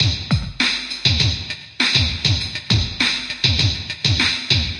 Same Funky105 beat through Amplitube effect